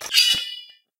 unsheath sword
metal, unsheath, sword, scrape, sheath, sharpen, weapon, wooden-scabbard, blade, draw, knife, combat, scabbard, ring, shing